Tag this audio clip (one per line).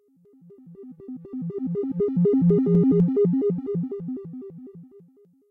Symetric-Sounds
reversed
overlayed
triangular-wave
synthesized
synthetic
Continuum-5